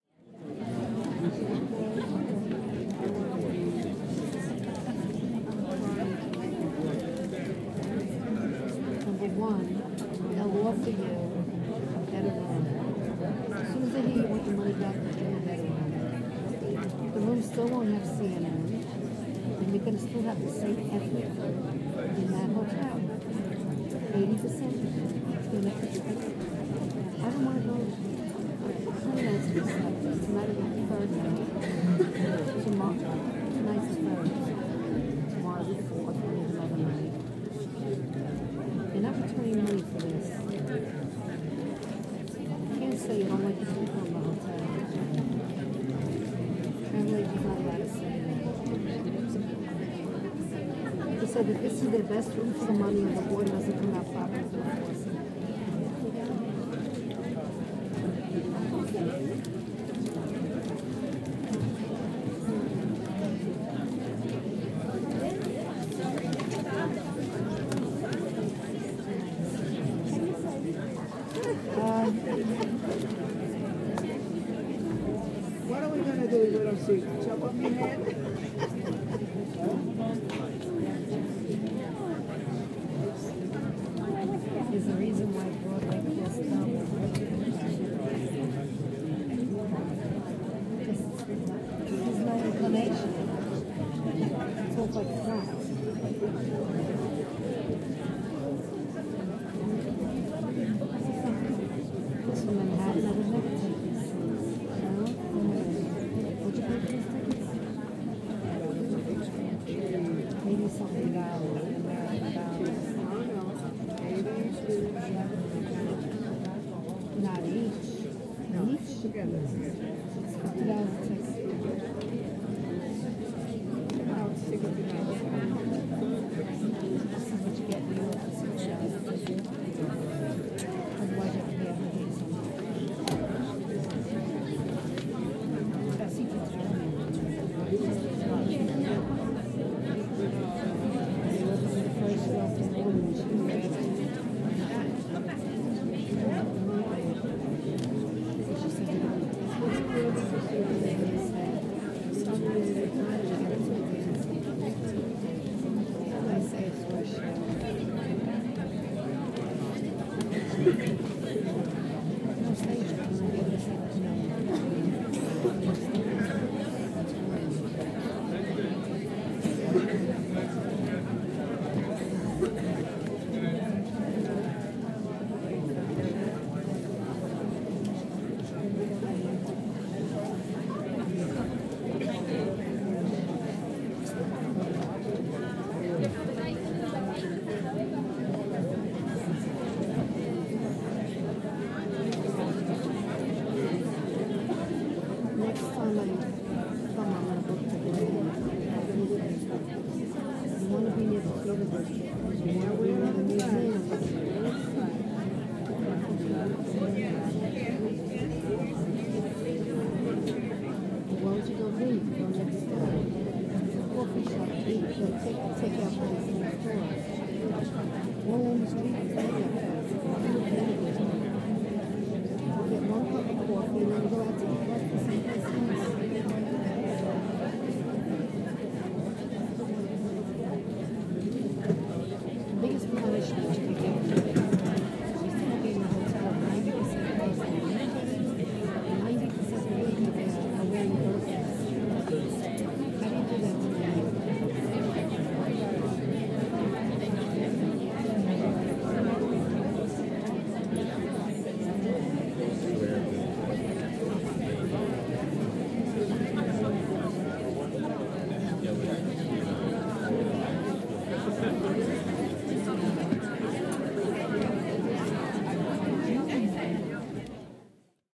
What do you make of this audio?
Audience waiting to a Musical in London